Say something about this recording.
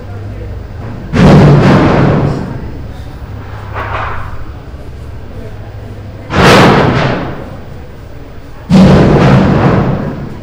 loud harsh clipped industrial metallic smash